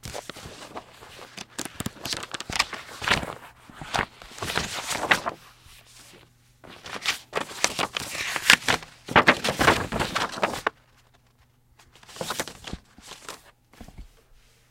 a recording of flipping through pages of a book and a leaflet.